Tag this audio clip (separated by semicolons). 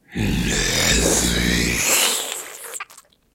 snort; moan; inhuman; brute; gasps; beast; breath; zombie; male; undead; horror; drool